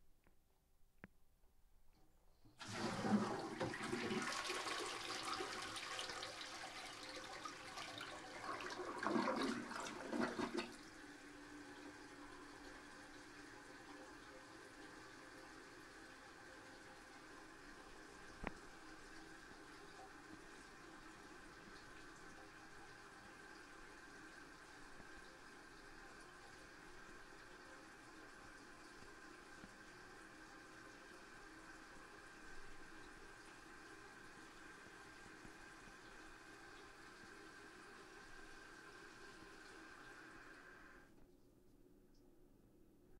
a toilet flushes
bathroom, flushing, toilet